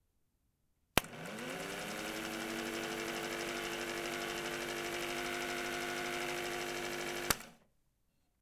Gem Pathescope 9.5mm projector, Unit #1191, Made in England. Recorded on a Marantz PMD661 with a Rode NT4 stereo mike.
The GEM is a variable speed projector, controlled by a rheostat. Speed for this recording was unknown, but probably 12-16 fps.
Microphone was facing the operational side of the projector, 50 cm away. Audio begins with power being turned on, ends with power being turned off.